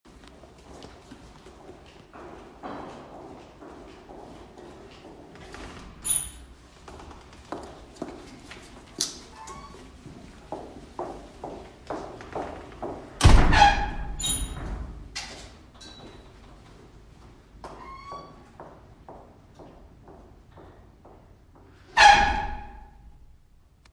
Woman walking in hall and opening and closing a squeaking metal door
A woman walking in a large hall towards the exit, she opens the metal door with a loud squaeking sound, the door shuts with a loud bang, other woman walk in.
opening-door, footsteps, squeaking-metal-door